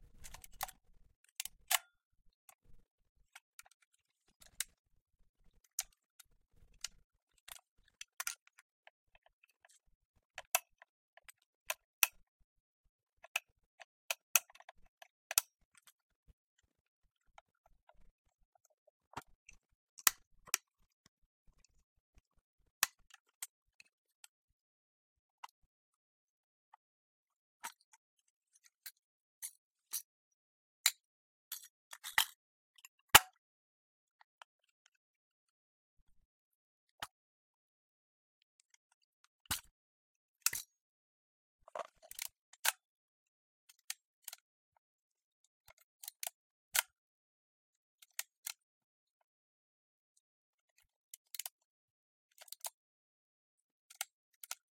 Movement of an old DSLR camera, shifting the lens, opening the film canister, capturing a photo, and opening the camera inners.
broken, cam, canon, click, OWI, photography, playing, shifting, shot, shutter, snap